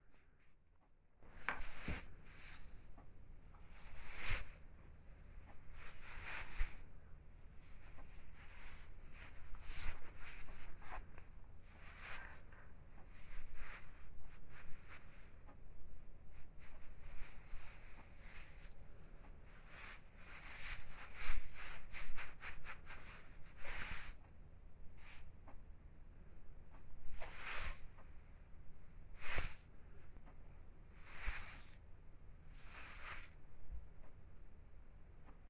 SFX of rustling cloth/ clothing. Edited from a recording of a container of powdered coffee creamer being shaken.
clothing, movement